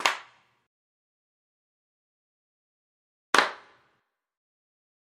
Clap with small reverb
sample, recording, reverb, Clap, field, buttchicks